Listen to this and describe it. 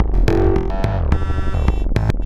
BPM.- 107 Theme.- Elastic